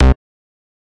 Synth Bass 016
A collection of Samples, sampled from the Nord Lead.
bass, synth, nord, lead